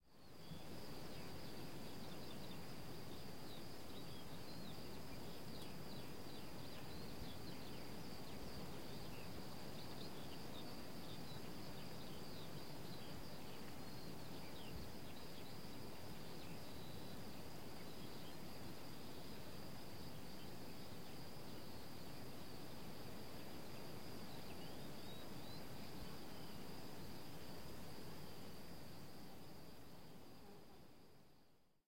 atmosphere-sunny-birds2
Ambience of field of grass. High noon, sunny day. Sounds of birds and delicate wind. Stereo recorded on internal Zoom H4n microphones. No post processing.
ambience, birds, city, day, field-recording, grass, hum, nature, outside, road